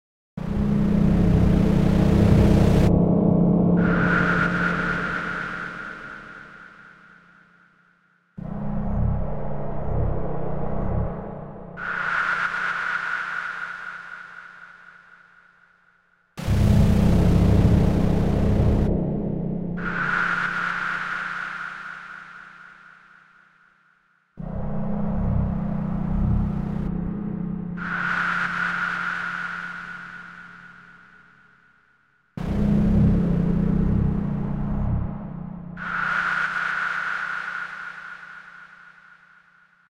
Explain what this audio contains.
An intense spacy/ambient sound. Made with Ableton